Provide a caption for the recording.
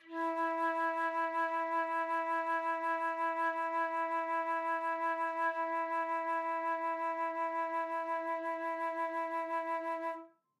One-shot from Versilian Studios Chamber Orchestra 2: Community Edition sampling project.
Instrument family: Woodwinds
Instrument: Flute
Articulation: vibrato sustain
Note: E4
Midi note: 64
Midi velocity (center): 63
Microphone: 2x Rode NT1-A spaced pair
Performer: Linda Dallimore
vibrato-sustain, midi-velocity-63, vsco-2, flute, midi-note-64, single-note, woodwinds, multisample